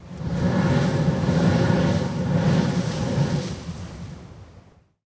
Spouting Horn2 Kauai
Poipu South island Kauai: Spouting Horn is a jet of water which can be seen shooting up from the sea like a geyser. A gurgling and groaning noise accompanies this display.
ambience, ambient, beach, coast, field-recording, Hawaii, islands, Kauai, lava, nature, poipu, sea, spouting-horn, volcanic, water, waves